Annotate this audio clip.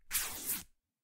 Tearing fabric
Tearing a piece of fabric.
Recorded with Samson G-Track.